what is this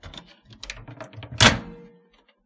Sound of a microwave door opening. Recorded with an iPhone SE and edited with GoldWave.
cook, door, food, kitchen, open